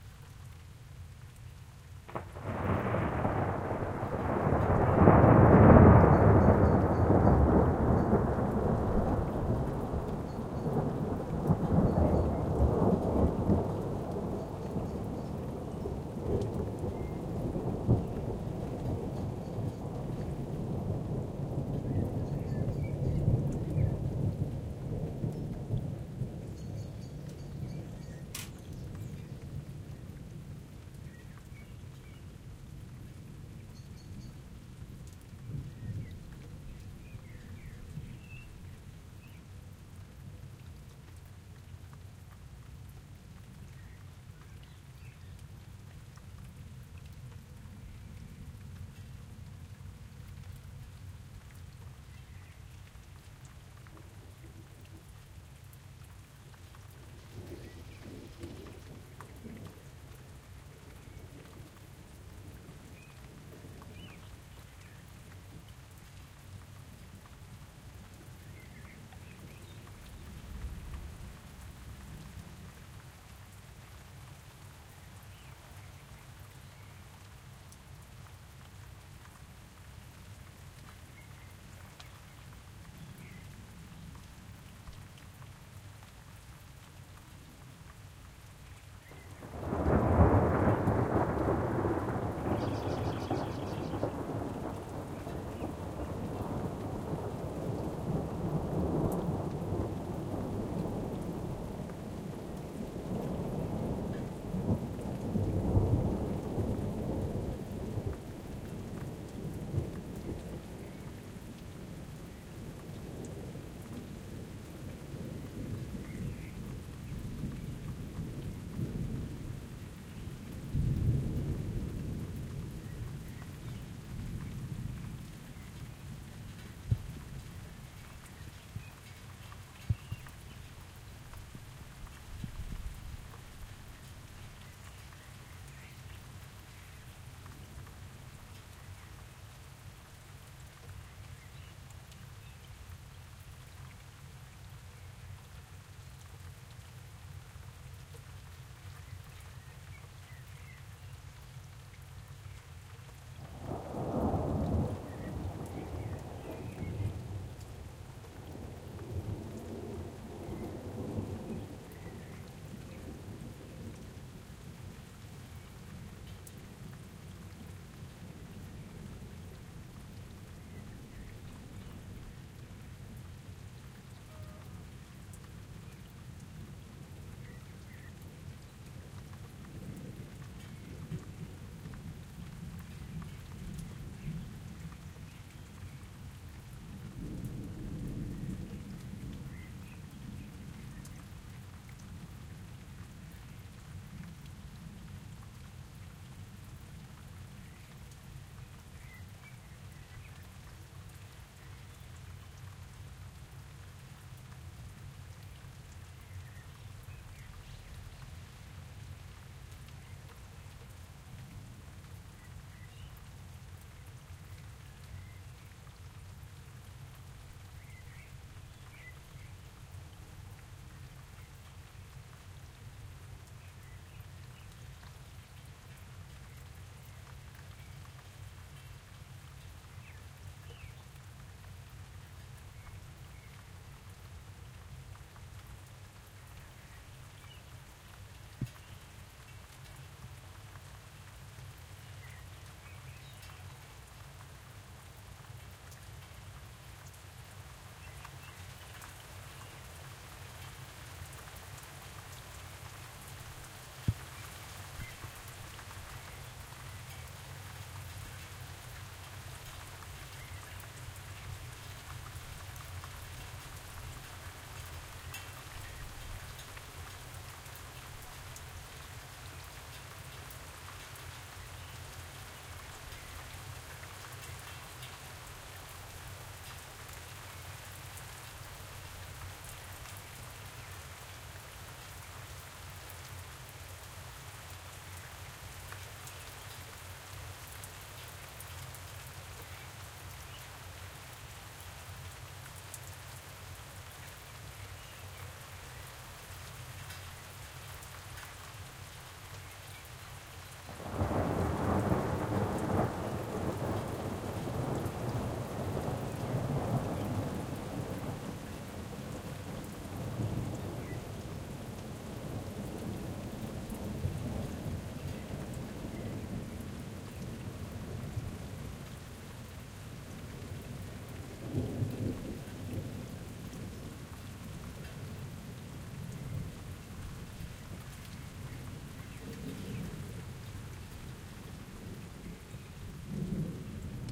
Thunder, silent rain and blackbird
Silent rain with blackbird singing and thunder - both close and far away. Recorded in best available quality with The Zoom H6 with the X/Y stereo capsule.